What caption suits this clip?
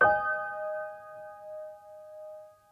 Piano chord
Some punches and touches on piano
acoustic, chord, piano